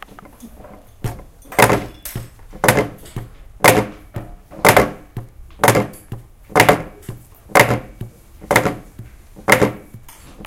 Hitting a table